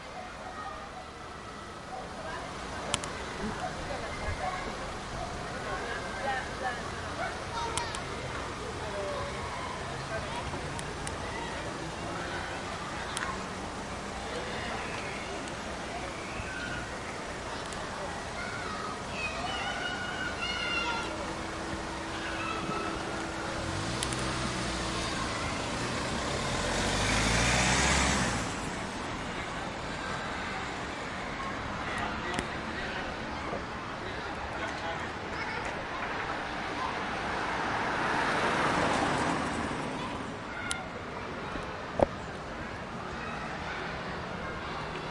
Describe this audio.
20211010 PlaçaCentreCivicParc Humans Traffic Nature Pleasant
Urban Ambience Recording at the square in front of the Baró de Viver Centre Cívic, by the playpark, Barcelona, October 2021. Using a Zoom H-1 Recorder.
Humans, Nature, Pleasant, Traffic